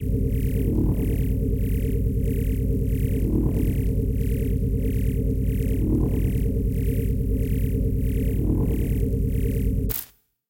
Scanner.Scanning process(7lrs,mltprcssng)
Sound design of the human body scanning process. Consists of seven layers of sounds, in turn synthesized in different ways. Has a looped section as well as a distinctive release at the end. I hope this sound can be useful to someone. Enjoy. If not difficult, leave links to your work, where this sound was used.